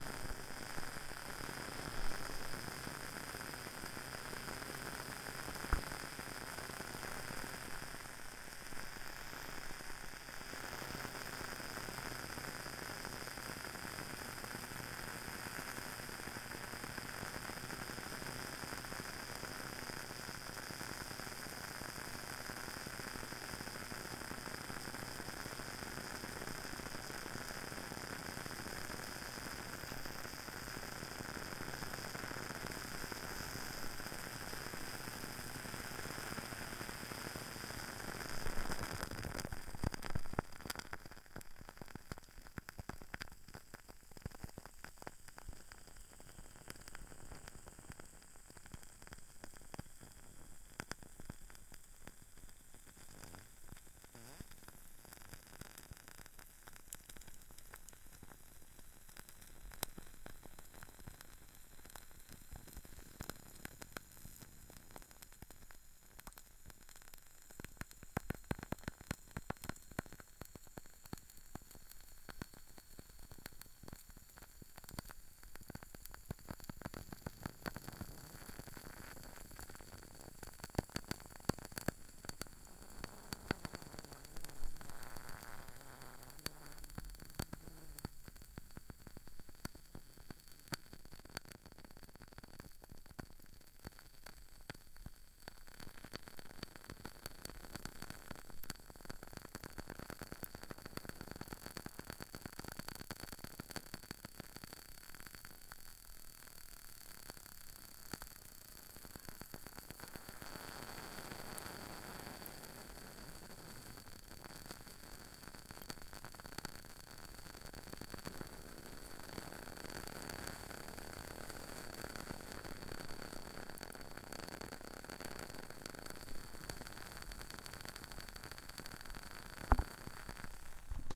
Woodwick crackling candle.